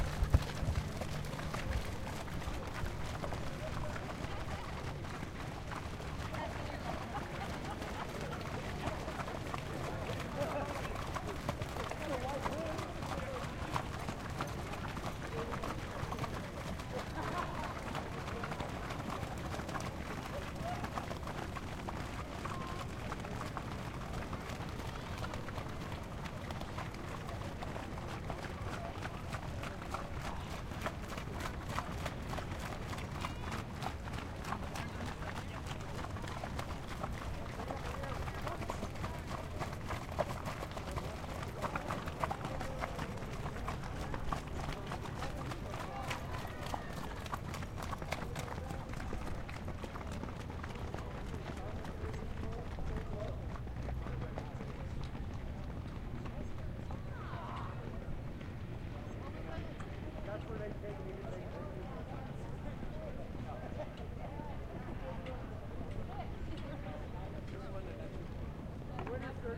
This is the sound of horses walking by at Arapahoe Park in Colorado. The crowd sounds are fairly quiet.